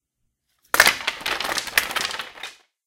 Pile of bones falling.